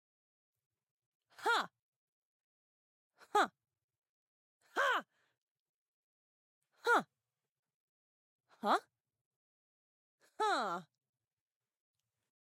Sampli Ha
cry, female, Ha, shout, voice